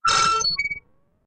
I created a strange and wonderful patch with my Nord Modular synth that was capable of making very realistic metallic scraping sounds.